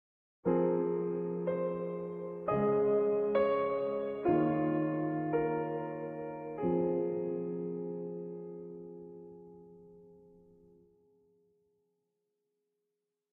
A small lyrical phrase.